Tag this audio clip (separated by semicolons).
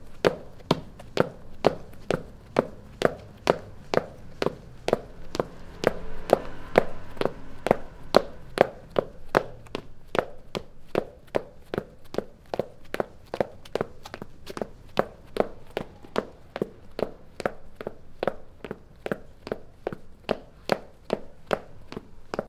pavement; footsteps